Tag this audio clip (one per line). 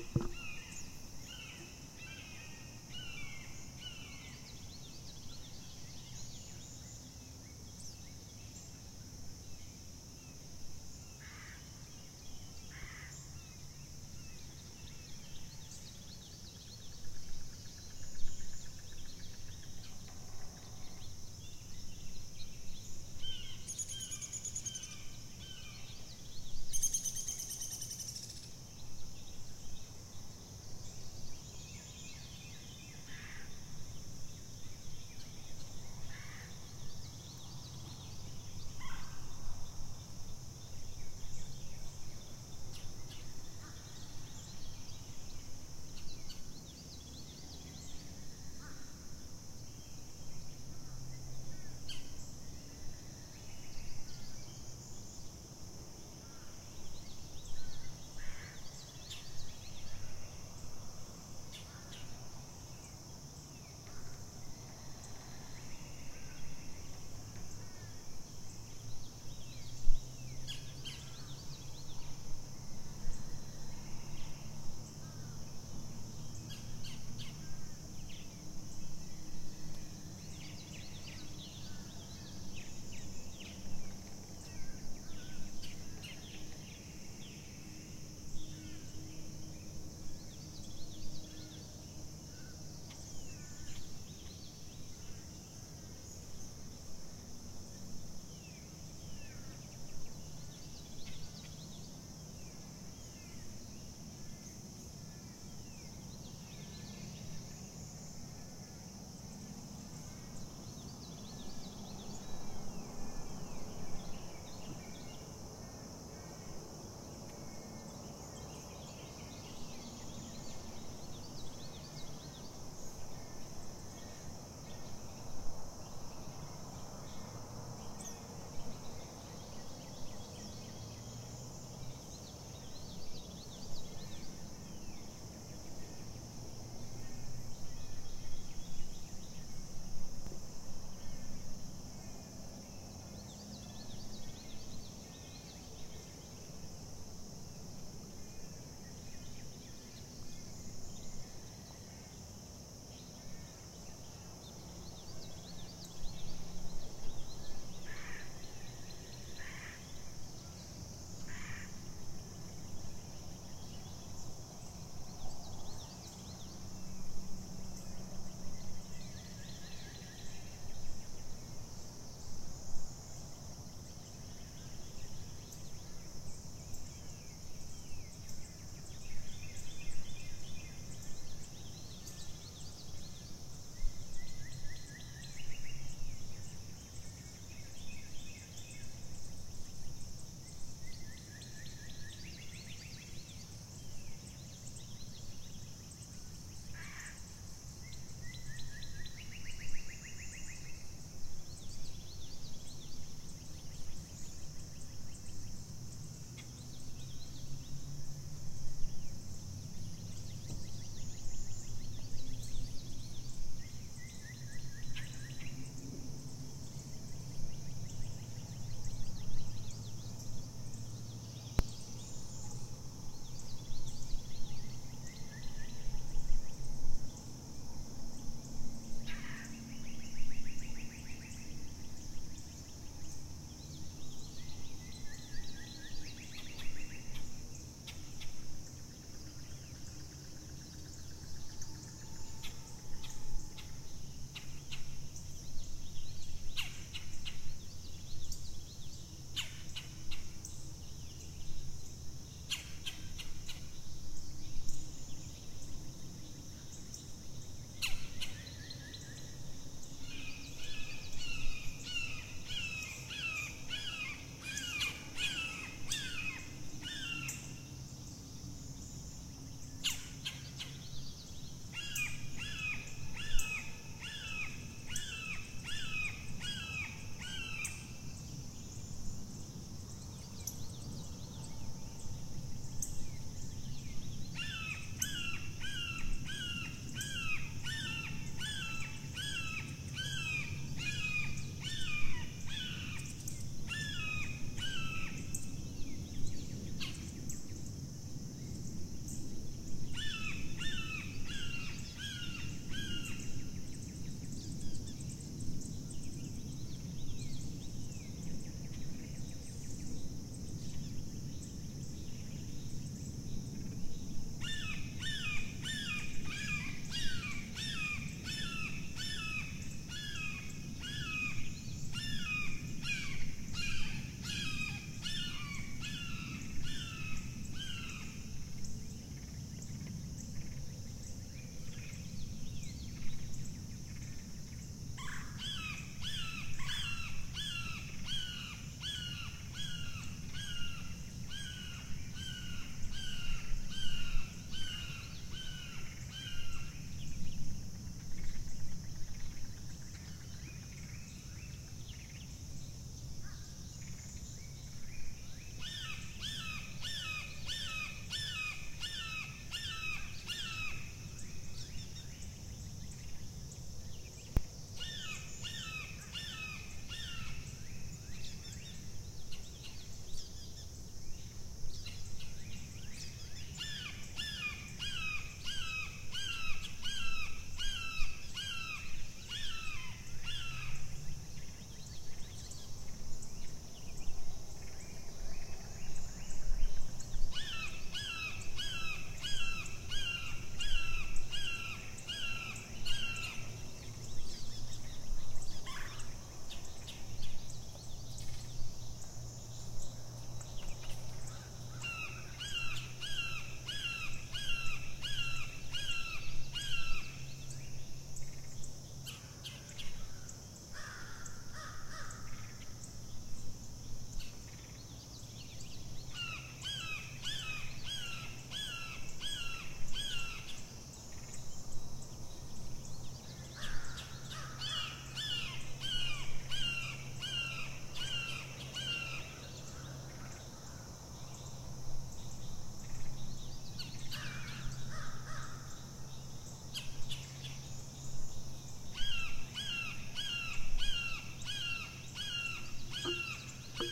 rural
southeast
morning
birds
ambient